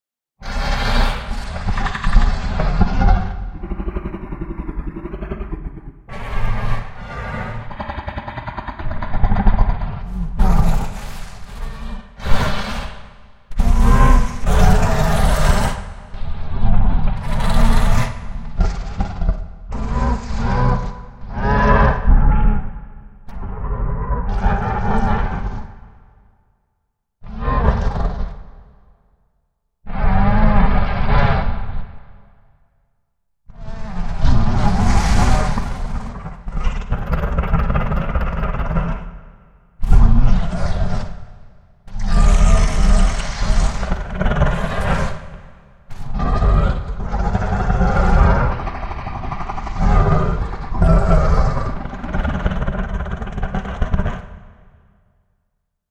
Predator creatures
Played around with couple of animal sounds made by various people.
Sounds by:
scary, growl, roar, dinosaur, beast, creature, vocalization, monster, animal